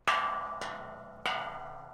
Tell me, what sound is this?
Kirkstall Iron Door.6
recording, midi, One, sampling